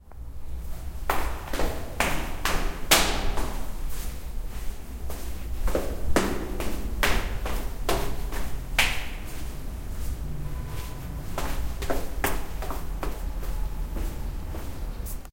Down building stairs
building, downstairs, footsteps, stair, stairs, steps